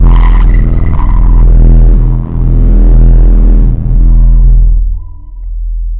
THE REAL VIRUS 14 - HEAVYPULZLEAD - E0
A pulsating sound, heavily distorted also, suitable as lead sound. All done on my Virus TI. Sequencing done within Cubase 5, audio editing within Wavelab 6.
distorted, lead, pulsating